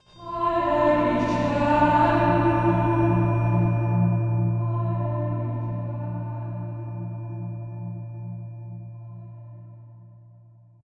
A short choral and synth sample. Part of my Atmospheres and Soundscapes pack which consists of sounds, often cinematic in feel, designed for use in music projects or as backgrounds intros and soundscapes for film and games.
synth, cinematic, electro, music, church, choral, atmosphere, processed, electronic, voice, choir, ambience